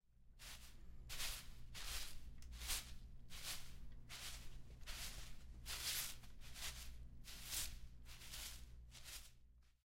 Steps on Snow
walking on snow
step, steps